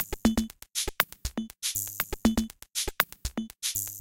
aisha glitch perc 120bpm
Some glitchy percussion I made using Ableton Live.